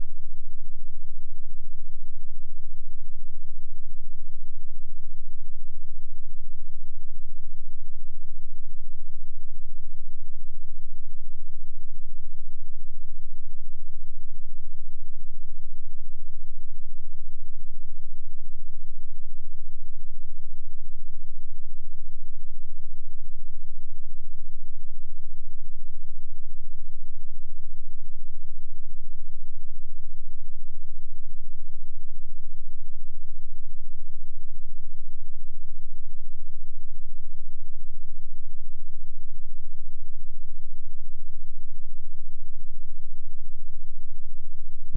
7.8 hertz sound